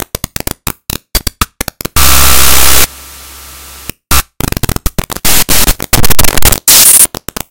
clicks and claps
just a couple of clappy noises which get noisier as it goes on
digital, glitch, modular, noise, percussion